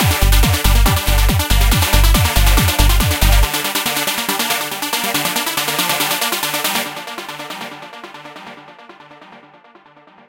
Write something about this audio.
Psy Trance Loop 12
The loop is made in fl studio a long time ago